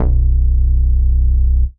SYNTH BASS 0104
SYNTH BASS SAW
bass, saw, synth